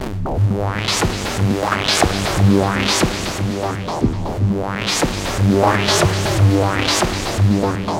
some industrial sounding loops created in samplitude, messing around with the filter cut-off to get some nice effects. Fours bars in length recorded at 120bpm
noise, loop, artificial, harsh, 120bpm, filter, industrial